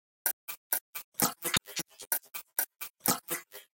water drops
A loop made from a recorded waterdrops
loop, percs, rythm